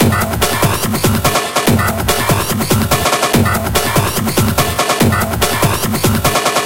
Hardbass
Hardstyle
Loops
140 BPM